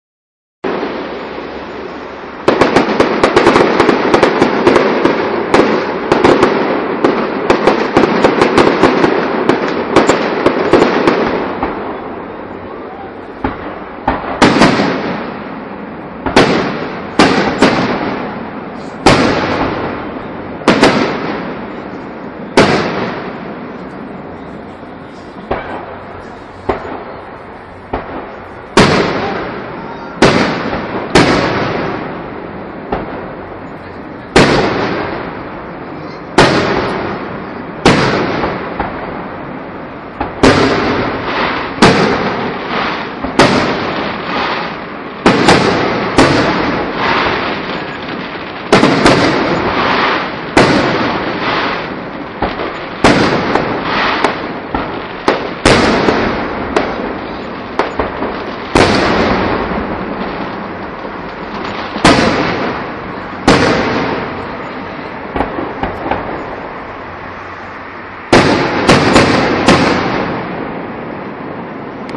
Fireworks on the street
Record straight from the street of little town in East Europe.
fireworks, holiday, street